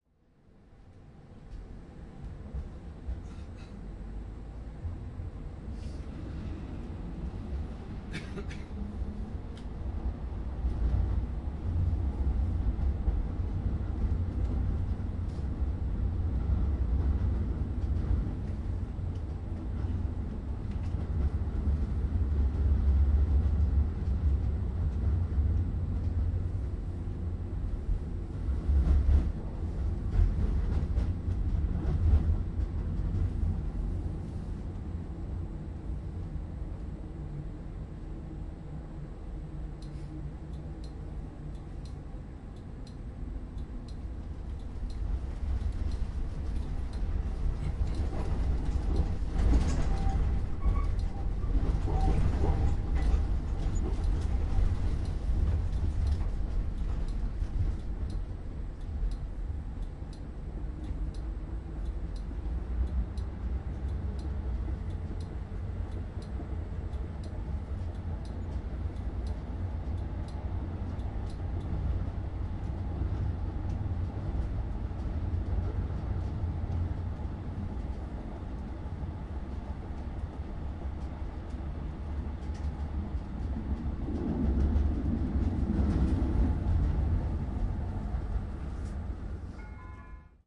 16 Tram ambient
Ambient, CZ, Czech, Pansk, Panska, Tram